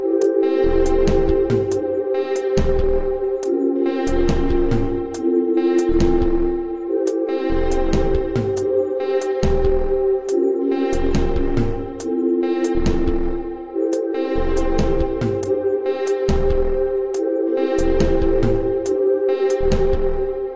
Processed sine wave. Delayed attack, chorus, saturated,re-verb. I like the voice like quality. Has some other stuff too.